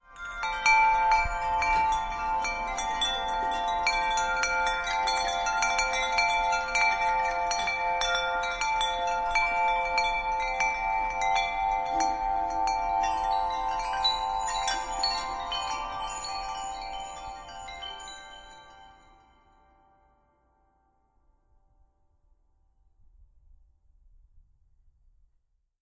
Wind Chimes, A
A quick extract of a collection of wind chimes I stumbled across at a gift shop at the Jacksonville Zoo, Florida. I have added a little bit of echo and reverberation using Audacity.
An example of how you might credit is by putting this in the description/credits:
The sound was recorded using a "H1 Zoom recorder" on 26th August 2016.
chime, chimes, glimmer, magic, magical, metal, shiny, sparkle, unicorns, wind, wind-chimes